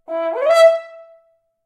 horn rip E4 E5
A "rip" is a quick glissando with a short, accented top note. Used in loud music or orchestral crescendos as an accented effect. Recorded with a Zoom h4n placed about a metre behind the bell.
brass, e, e4, e5, french-horn, glissando, horn, rip